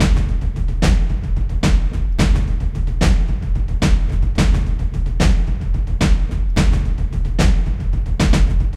CINEMATIC PERCUSSION.
Epic hybrid drums, Tools: Damage, Akai MPC4000
trailer, soundtrack